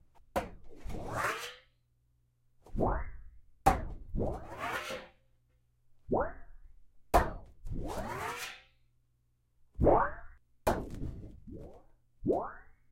A stereo recording of a 4' x 4' piece of sheet metal being shook around.
Stereo Matched Oktava MC-012 Cardioid Capsule XY Array.